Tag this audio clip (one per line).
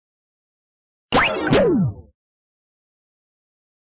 shutdown
down
artificial
power-down
film
FX
voice
robot
power
machine
space
computer
galaxy
deflate
static
energy
game
charge
electronic
starship